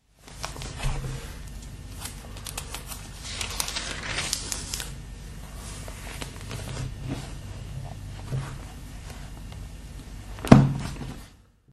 Turning the pages of the book Malachi in the Bible (dutch translation) the church has given my father in 1942. A few years later my father lost his religion. I haven't found it yet.
book, paper, turning-pages